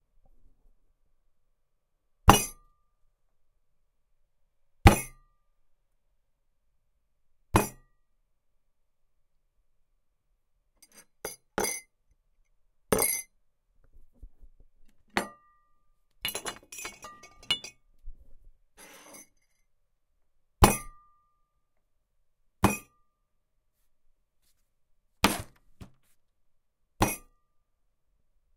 Hit the table,recorded on the zoom h5 at home
Boom, Hit, kick, table